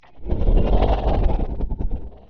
Weird Shark Noise
Needed to make a sound for a shark character for my game and since sharks cannot physically make noise I had to improvise and this was the result.
Animal, Creature, Fantasy, Growl, Shark, Snarl, video-game